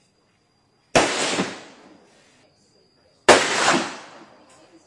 This sound belongs to a sample pack that contains all the sounds I used to make my Vietnam mix. (I'll post more info and a link on the forum.) These sounds were recorded during a trip through Vietnam from south to north in August 2006. All these sounds were recorded with a Sony MX20 voice recorder, so the initial quality was quite low. All sounds were processed afterwards. Near the Cu Chi tunnel complex, some tourists go for the guns. AK something machineguns.
asia, gun, gunshot, gunshots, shooting, sound-painting, vietnam